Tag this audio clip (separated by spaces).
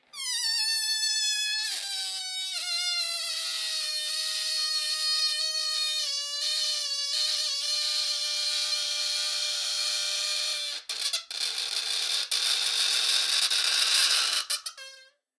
balloon Squeaky inflate